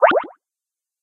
Beep created in Logic Pro